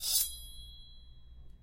Sword slide
sword, slide, metal